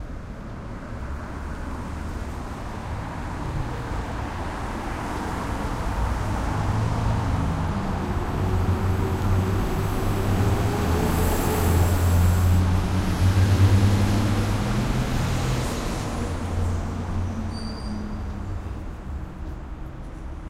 street noise on 3rd st and 94 hwy on ramp